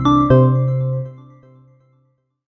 I made these sounds in the freeware midi composing studio nanostudio you should try nanostudio and i used ocenaudio for additional editing also freeware
application, bleep, blip, bootup, click, clicks, desktop, effect, event, game, intro, intros, sfx, sound, startup